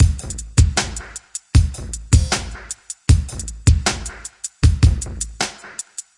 Drumloop 05 78bpm
Roots onedrop Jungle Reggae Rasta